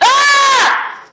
Female Scream
Woman stress scream recorded in the context of the Free Sound conference at UPF
666moviescreams, female, human, nerves, scream, stress, voice, woman